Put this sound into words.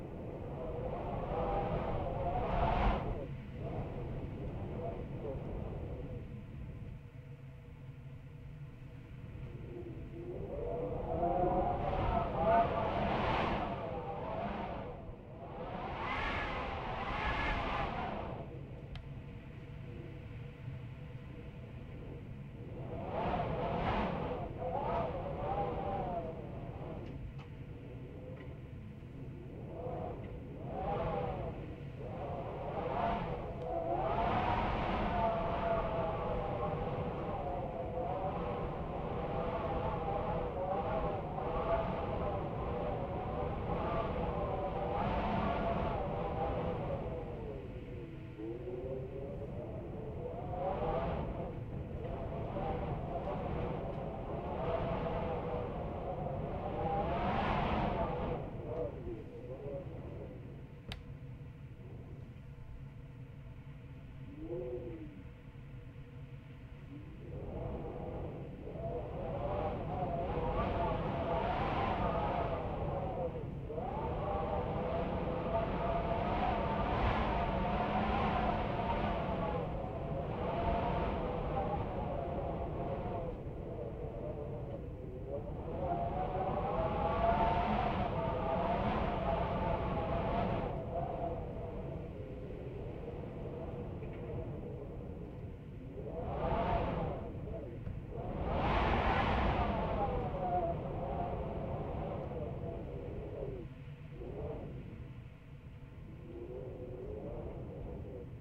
wind whistle kitchen window whiny low1
kitchen, low, whiny, whistle, wind, window